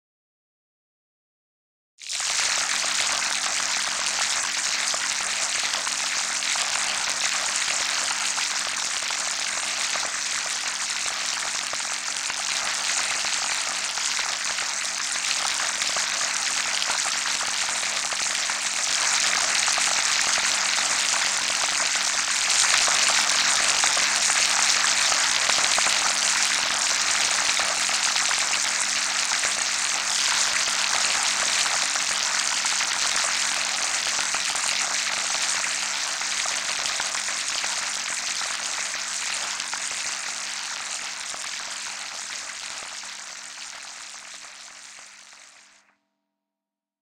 Artificially created rain sound.
amSynth and a few ladspa, LV2 filters used.
I made this sound quite by accident while experimenting with the several controls on the synth prog!
noise, stream, water, Synthetic, rain